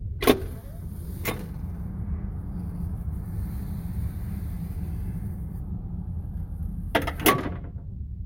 Metal Door Open/Close
Metal door opening and closing. This is a wave file.
Metal, Close, Open, Door